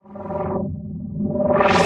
Sounds like a flying saucer. This is an example of digital signal processing since this was created from recordings of random household objects in a studio.
Takeoff, Alien, Sci-Fi, Engine, Spaceship, UFO